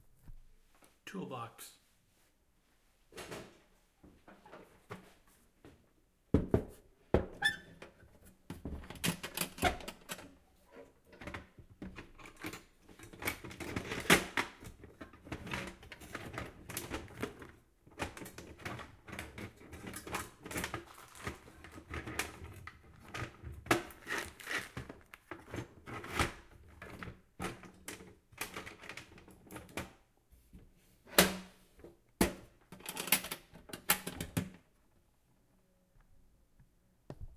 FOLEY toolbox
What It Is:
Setting a metal toolbox on a wooden table. Opening the latched toolbox and shuffling the various tools inside. Closing the toolbox.
Arranging a toolbox.
AudioDramaHub, foley, metal, toolbox